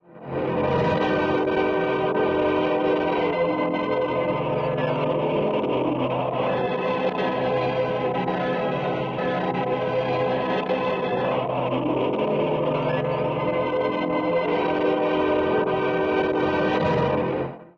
Low Mens Choir Chop and Reversed
choir, chop, reel-to-reel, tape